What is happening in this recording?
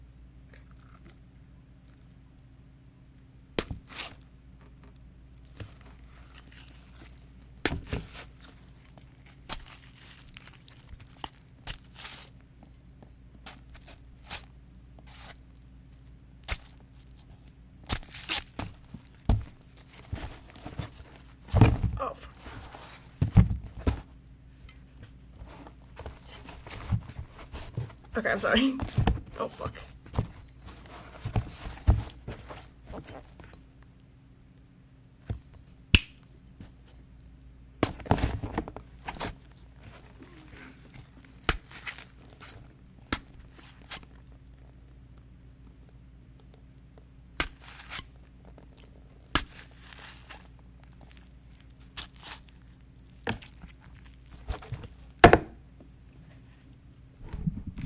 Flesh Slice and Slash
Various takes of flesh being slashed and sliced. Created by slashing at a watermelon with a butter knife.
We ended up using this in a horror slasher film when the killer slices a victim's neck.
splat, slicing, bloodsplat, horror, knife, foley, blood, squelch, flesh, slash, horror-effects, intestines, slice, slasher, horror-fx, death, gore